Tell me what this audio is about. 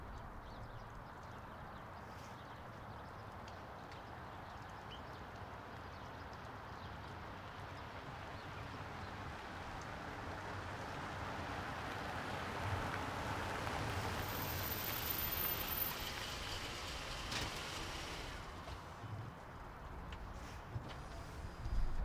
Car Arriving and Parking (drive up, short idle, and engine off)
short recording of a car (specifically an SUV, but could easily work for a sedan or light truck) driving in from a distance and parking. It idles briefly, and then turns off the engine. Also some light suburban neighborhood ambience (light wind, subtle traffic in the distance).
squeak, sedan, ambiance, neighborhood, suv, drive, park, car, ambience, engine, wind, brake, idle, stop, exterior